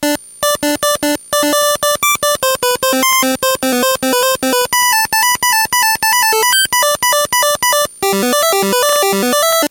LSDJ At its best (well... ) I just bought the thing. Lay off these rythms Kids....
c64, chiptunes, glitch, kitchen, little, lsdj, me, nanoloop, sounds, table